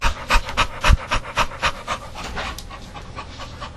This is my Old Victorian Bulldogge Ruby panting after playing outside. She loves to run and gets winded! We would love to know how you use the sound. It was recorded with an Olympus Digital Voice Recorder VN-6200PC Update: Ruby has since passed in spring 2021 at the age of 13. We love her and miss her very much.
breathe, pant, dog, bulldogge, bulldog